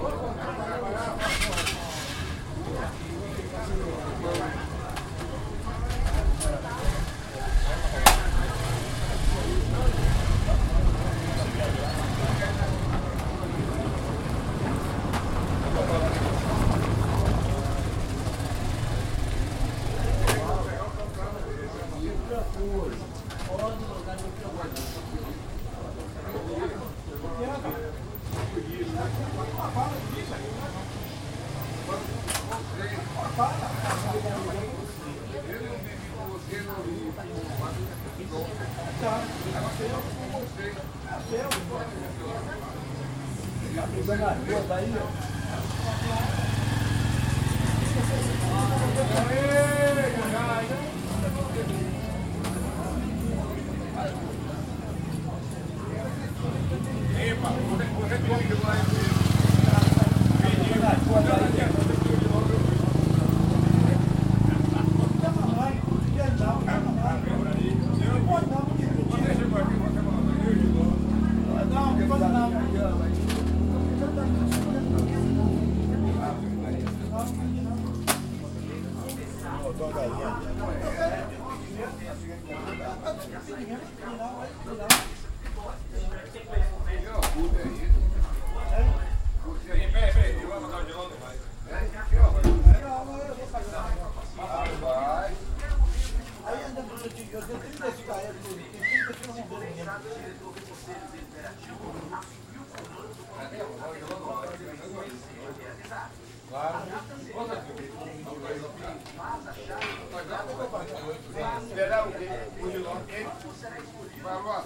Bar de Seu André a noite - Bar do Seu André at night
Homens conversam, dão risadas e jogam dominó, enquanto carros passam buzinando e pessoas conversando.
Gravador por: Bruna Maria
Equipamento: Tascam DR 40
Data: 24/Mar/2015
Hora: 19h38
Men talk, laugh and play dominoes, while cars pass honking and people talking.
Recorded by: Bruna Maria
Equipment: Tascam DR 40
Date: Mar/24 /2015
Time: 7:38 p.m.
bar, carros, cars, conversas, conversations, field-recording, homens, men, moto, motorcycle, people, pessoas